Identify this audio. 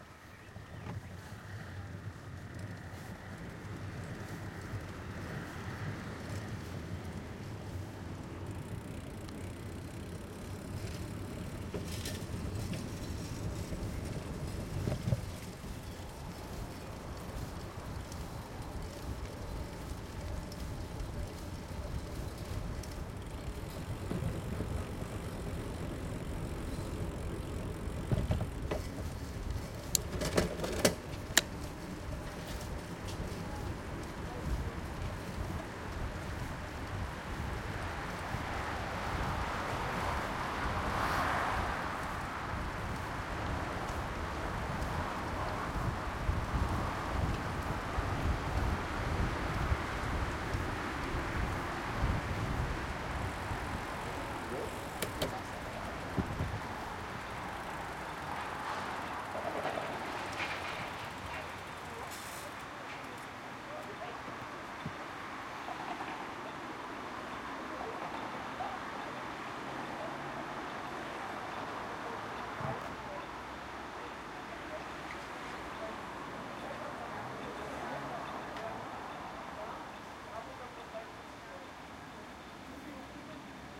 4ch surround recording of a bike riding through city traffic (Leipzig/Germany) on an evening in late spring.
Recorded with a Zoom H2 with a Rycote windshield in a shock mount fastened to the center frame above the pedals.
All recordings in the set are raw from the recorder and will usually need a hi-pass filter to deal with the rumble.
Starting to ride after waiting at a red light, turning into a major 4-lane road and riding on a cycle-lane next to the road. Some passing cars and voices of pedestrians can be heard. At the end, stopping again at a red light.
These are the FRONT channels, mics set to 90° dispersion.